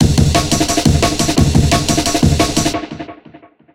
18 ca amen
amen break processed with delay
drums, breakbeat, amen, beat, jungle, break